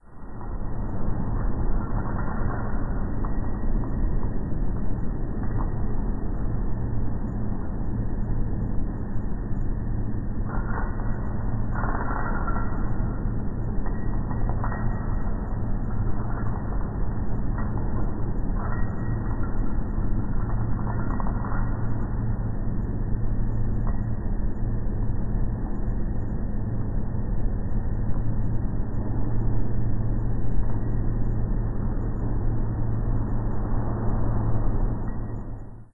Abandoned Metro Tunnel 02
Abandoned Metro Tunnel
If you enjoyed the sound, please STAR, COMMENT, SPREAD THE WORD!🗣 It really helps!
if one of my sounds helped your project, a comment means a lot 💙
Abandoned, Atmospheric, Dark, Game, Metro, Station, Subway, Track, Train, Tunnel, Underground